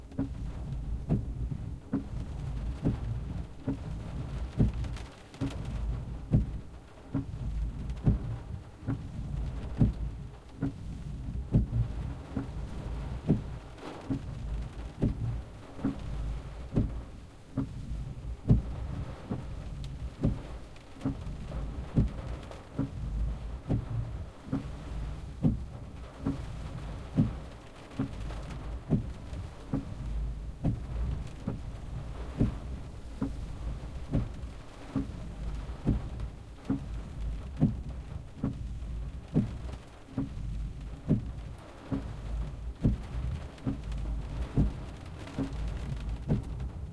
Windscreen Wipers in the Rain Loop (1)
Fully Loopable! Rain and wind with wipers on a medium speed recorded inside a car.
For the record, the car is a Hyundai Getz hatchback.
The audio is in stereo.